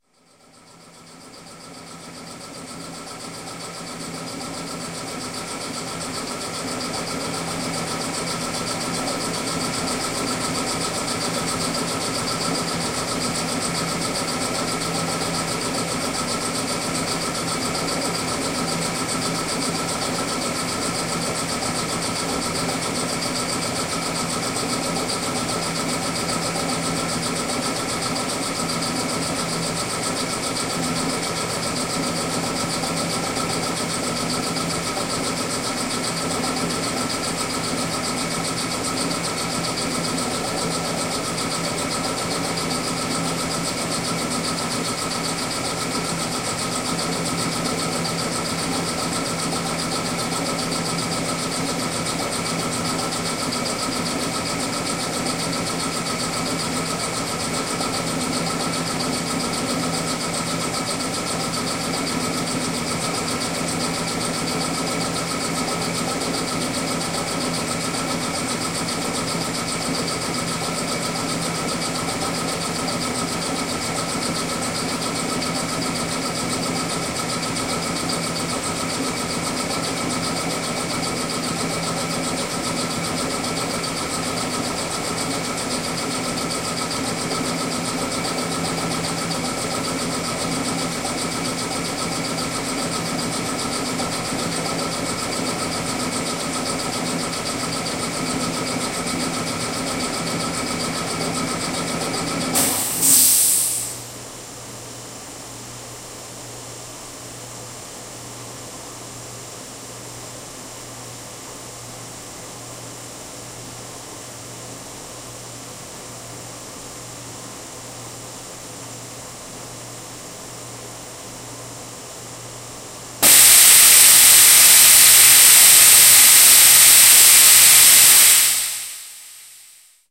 bensonhall basement
An engine of sorts, a component in an elaborate filter system beneath the RISD Printmaking studios. This sound of the Benson Hall basement was recorded on a Marantz PMD661 with built-in microphones in February 2010.
basement, engine, machine, new-england, rhode-island